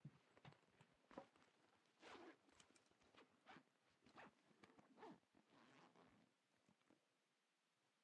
using a zipper